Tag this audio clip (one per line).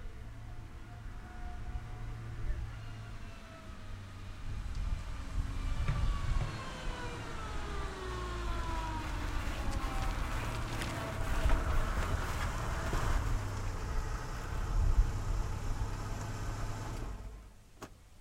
reversing
car